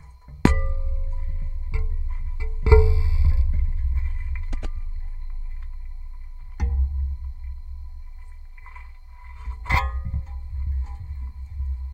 contact mic on plate02
A contact mic attached to a bird feeder made that is made out of three plates